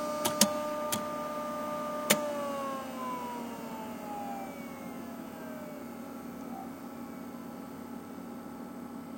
0105 DVW500 int stop-unthread
DVW500 tape stopping, unthreading tape into the cassette.
This sample is part of a set featuring the interior of a Sony DVW500 digital video tape recorder with a tape loaded and performing various playback operations.
Recorded with a pair of Soundman OKMII mics inserted into the unit via the cassette-slot.
cue, digital, eject, electric, machine, mechanical, player, recorder, shuttle, sony, video, vtr